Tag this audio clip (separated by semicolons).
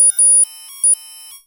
computer; sound; blip